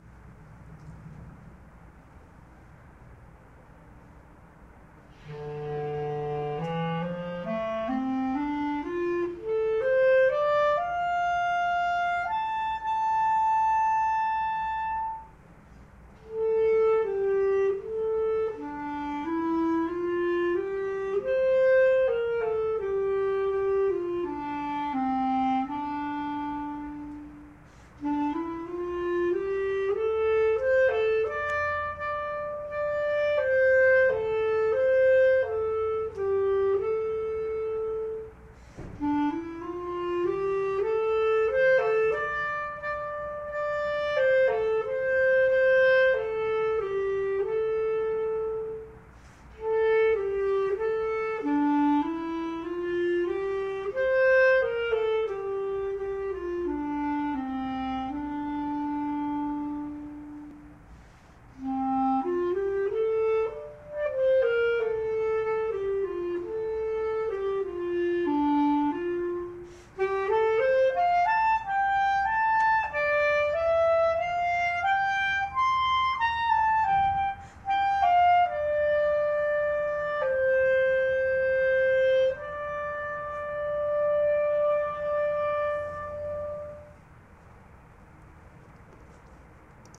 Ralph Vaughn Williams' Six Studies in English Folk Song III practice performance session
clarinet, english, folk, practice, ralph, session, six, song, studies, vaughn, williams